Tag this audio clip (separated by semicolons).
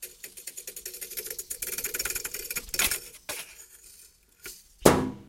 brush; hits; objects; random; scrapes; taps; thumps; variable